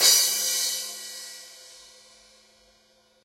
Crash2MS
Files labeled "MS" correspond to Mid/Side recordings. This allows for adjustment and separation of the stereo image. To makes the most of these samples, I suggest you do, or the stereoness could sound exaggerated. Most DAWs and audio editors have this capability, or you can use any of several free applications, such as Voxengo's MSED (set to "inline" mode).
The pack variations correspond to different recording techniques and microphone combinations.
1-shot, crash, crash-cymbal, DD2012, drums, mid-side, percussion, stereo